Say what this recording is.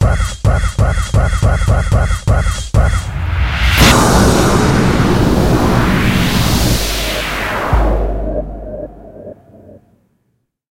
A News Sting suitable for Transactional TV or Factual
Hard, Impact, Punchy